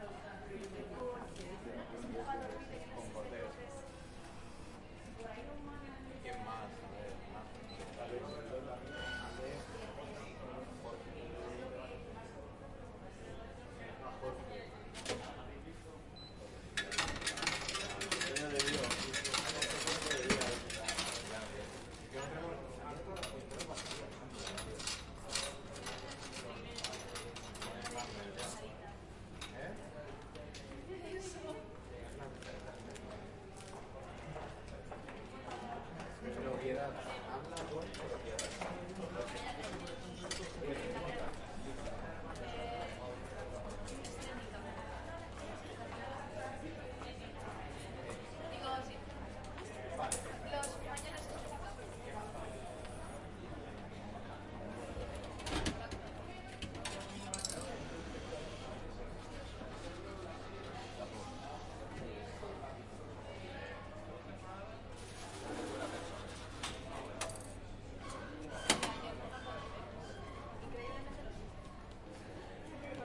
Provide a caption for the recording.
Ambient Sound of large room with people talking in the background and midplane of a person using a vending machine. It was processed with Adobe Audition CC, equalized and compressed. It was recorded with a Zoom H4 Handy Recorder with built microphones.
Ambient, Coins, People, Room, Talking, Vending-machine